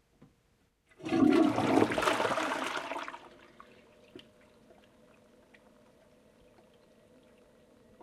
Mono recording of water falling from an opened tap into the sink. See the others in the sample pack for pitch-processed.
pitched, sink, slow, strange, water